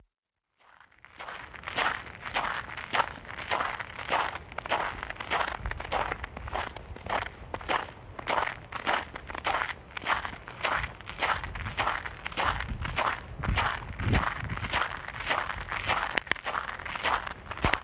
walking in hardened snow, Lacey, Wa. Feb 23, 2018